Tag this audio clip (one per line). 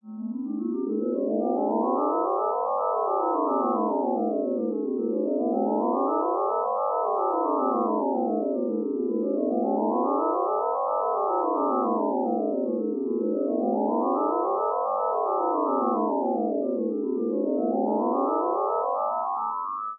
synth
image
loop
pattern